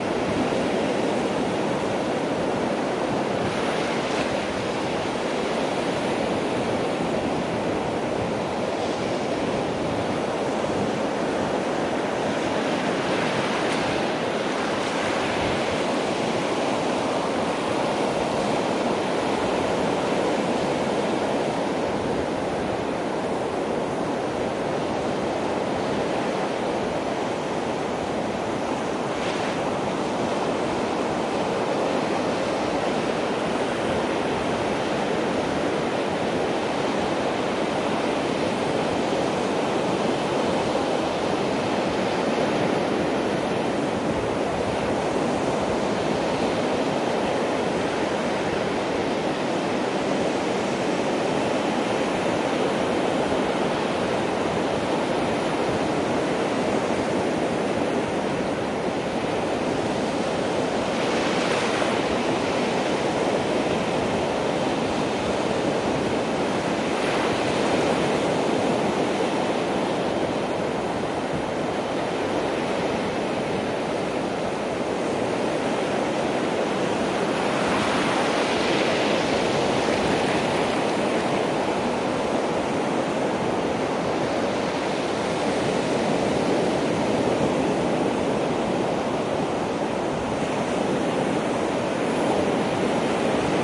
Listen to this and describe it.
Standing at the North Sea in Denmark on an evening with medium wind.
Position: in the water until ankles, so right at the edge